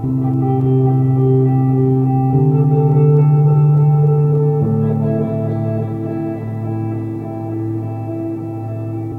A Rhodes played through a home-made granular effect. For late night listening, it is a seamless loop.